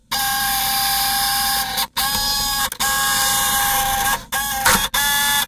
This is the entire sequence of sounds of a Canon MV100 digital video camera opening and then closing its Mini DV cassette door. Complex! Great Japanese engineering.